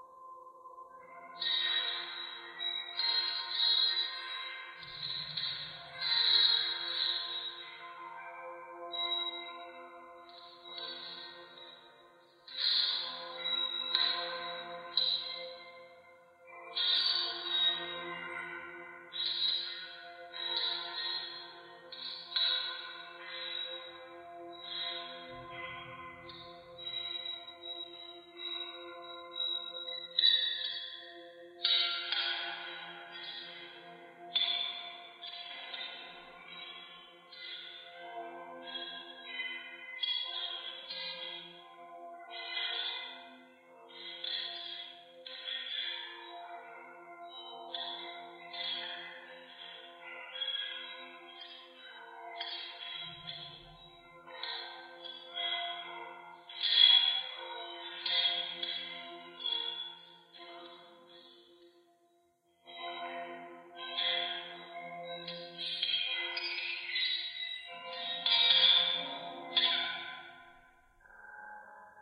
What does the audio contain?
Guitar Metallic Granulated
This could be used (for example) as a sci-fi bed or other background.
This sound was created using a guitar recording, modified with the USSS Tools for the pure data programme. I granulated it and did other things but I can't remember what.
fi, metallic, guitar, mysterious, sci-fi, sci, scifi